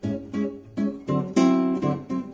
bossa loop
Me playing a loopable bossa nova rhythm on my guitar, changing from Amin7 to Dmaj7/9. Vivanco EM35, Marantz PMD 671.
bossa, bossa-nova, brazil, guitar, loop, rhythm